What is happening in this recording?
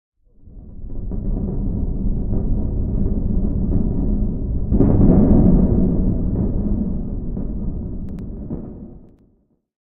Thunder Roll
Recorded AKG 401 using sheet of metal edited in audition with various filters.